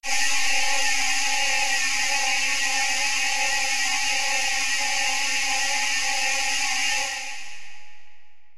fit in pads 3
techno, noise